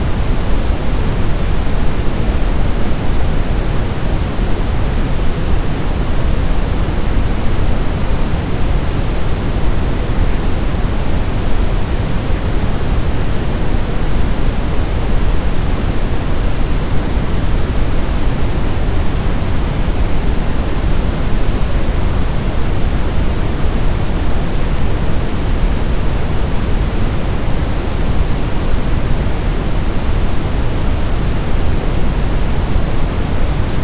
30 seconds of brown noise